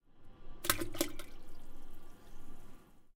int bagno vuoto feci
recording of excrement in a school